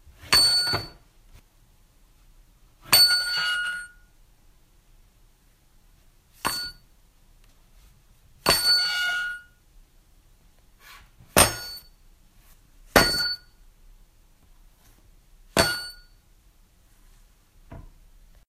Metallic ding
Knife sliding against the sink creating a unique vibrating ding.
Long or short depending on the slide of the knife.
Recorded with iPhone 6s
game, ting, vibration, bell-tone, ding, metal, metallic, ring, bell